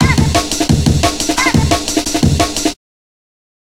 13 ca amen
amen drums processed with a vocal hit
amen, beat, break, breakbeat, drums, jungle